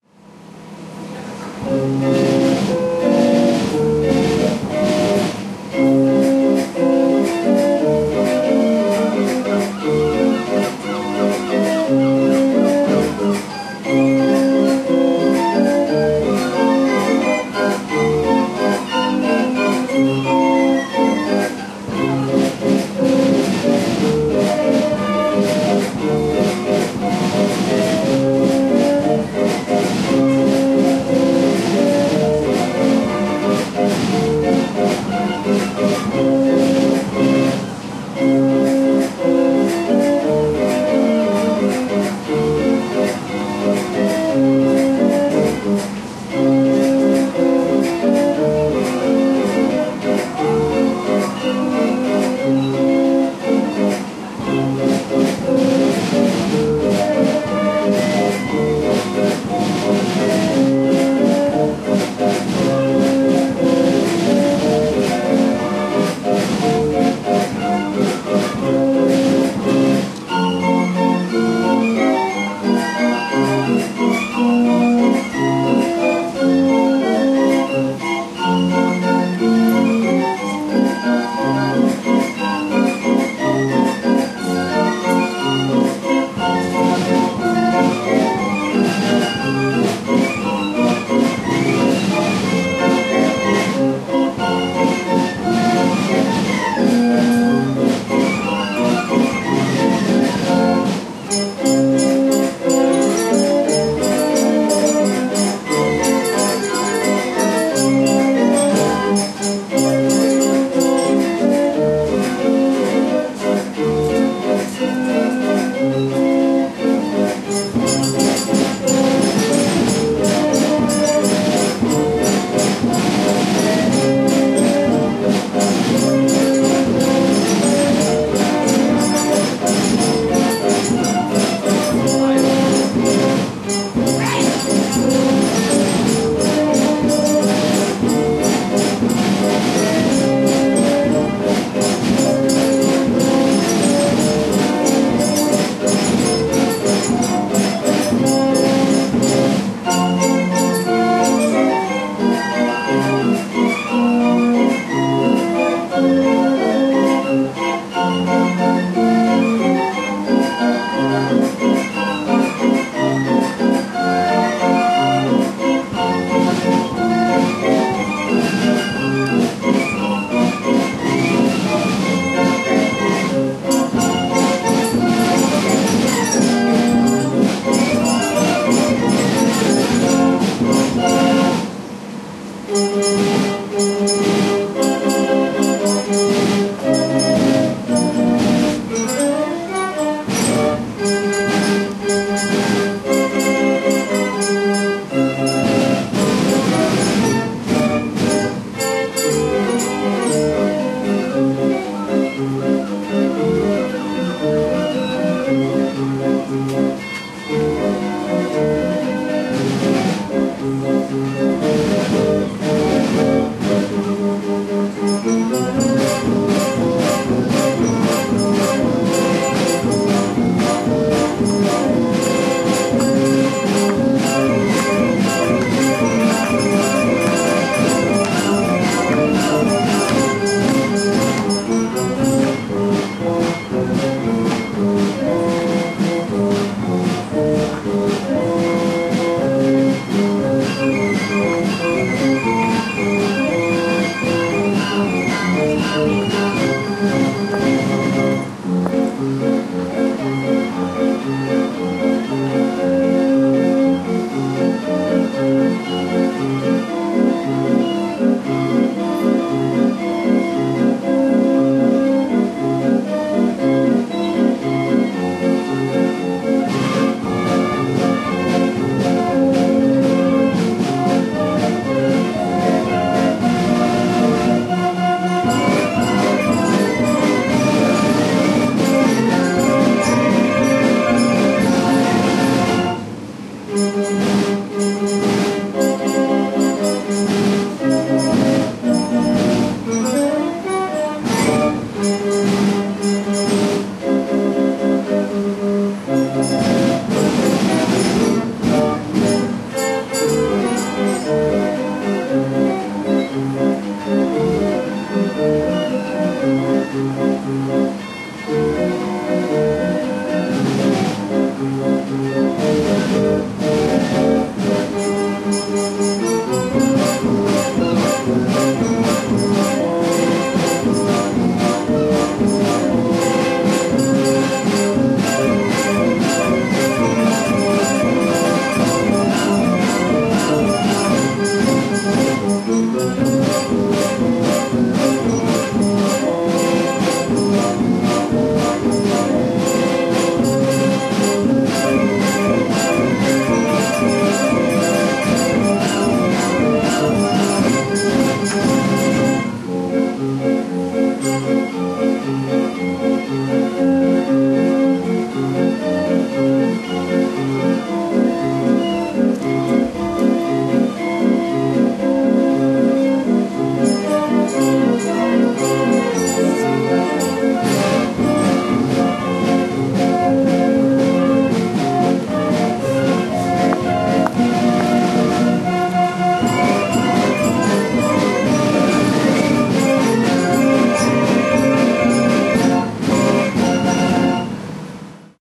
Carousel / Merry-Go-Round, Brighton Beach, UK
Recorded on a Zoom H2 while taking a walk along the prom in Brighton.
amusement, automaton, Brighton, carnival, carousel, fair, fairground, fun, hurdy-gurdy, merry-go-round, organ